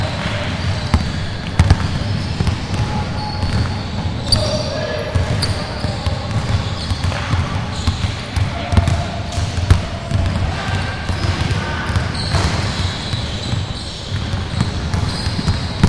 Noisy background in a sports hall
TheSoundMakers, bounce, ball, UPF-CS13, basketball